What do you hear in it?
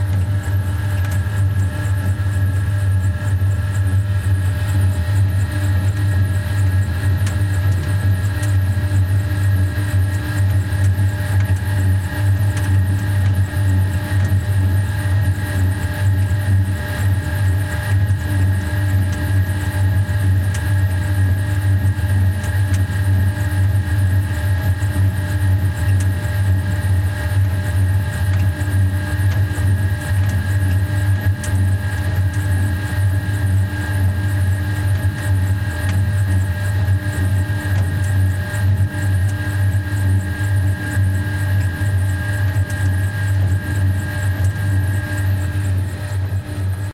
pump steady
field-recording in stereo of a medium waterpump working at a rather small pace